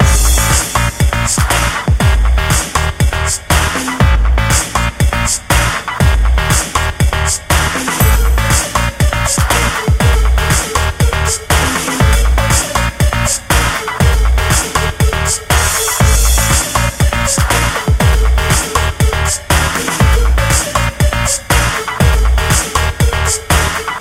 Loop Fighting Evil Mummies 00
A music loop to be used in fast paced games with tons of action for creating an adrenaline rush and somewhat adaptive musical experience.
victory, music, music-loop, videogames, battle, indiegamedev, game, games, loop, gamedeveloping, war, gamedev, gaming, videogame, indiedev, Video-Game